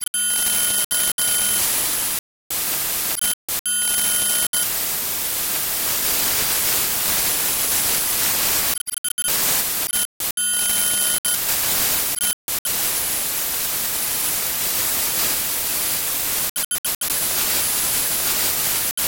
Bad com link sound
A standard distorted communication link with static dips in sound.
communication, frequency, interference, link, noise, radio, signal, static